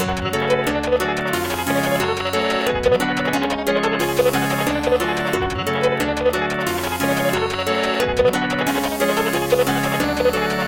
envlving etude 7
glitch, noise, electronic, classic, classical